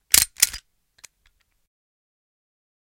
Pistol Cock
cocking a pistol after reloading
Recordist Peter Brucker / recorded 4/21/2019 / shotgun microphone / created by twisting foley door knob back and forth
cock, reload